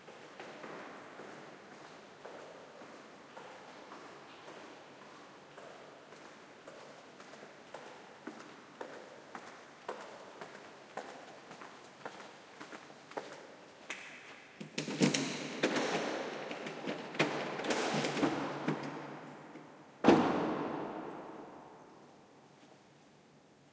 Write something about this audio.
20150712 underground.parking
Steps approaching a car in an almost empty underground parking, a door is open, then closed. Shure WL183 into Fel preamp, PCM M10 recorder
automobile, driving, echo, field-recording, parking, steps, underground, walking